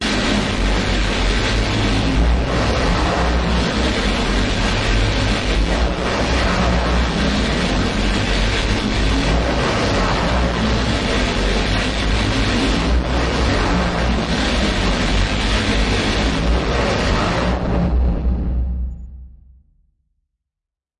Noise - Bellowing Horns
Harsh noise ambient texture with stereo spacing and lots of bass. Good for horror ambiences or electroacoustic / noise projects.
abstract, electronic, grating, harsh, loud, noise, power-electronics